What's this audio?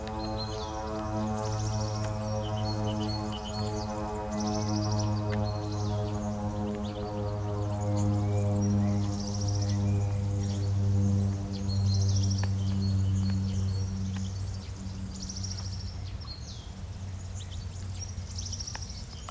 20060425.planes.birds01
airplane passing and birds in BG. Sennheiser ME62 > iRiver H120 / avioneta y pajarillos
airplane, birds, field-recording, nature, spring, tits